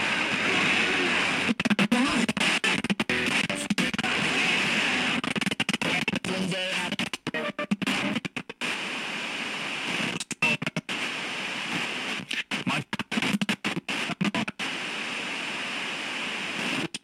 FM Radio Scrubbing
a radio scrubbing thru FM stations in LA
radio, scrubbing